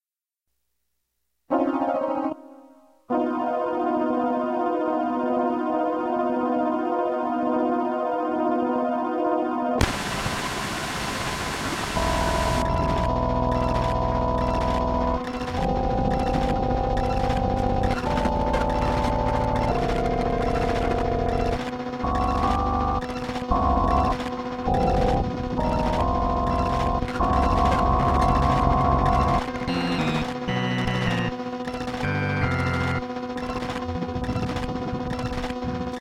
Casio CA110 circuit bent and fed into mic input on Mac. Trimmed with Audacity. No effects.